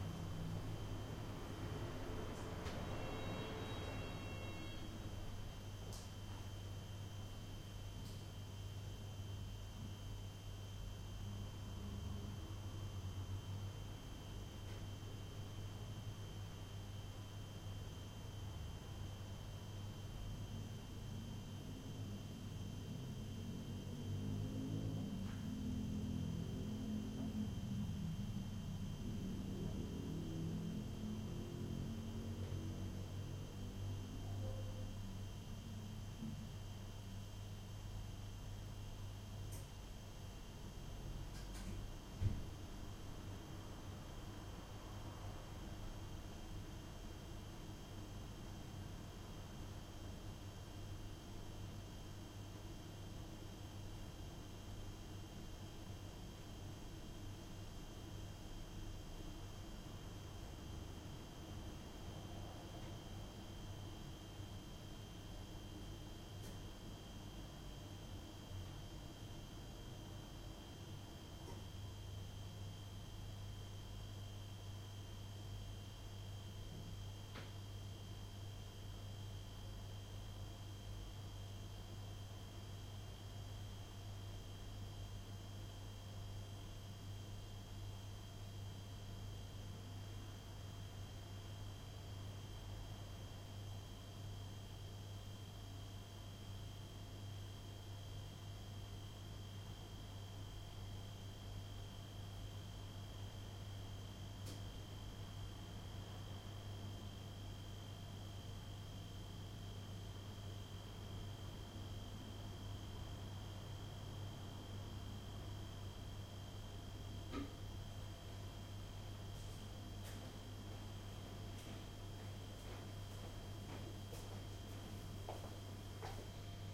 Room tone cocina
room tone de cocina en departamento del centro de La Plata
city, cocina, de, heladera, backround, home, ambiente, ciudad, fondo, refrigerator, kitchen, domestic-sounds, interior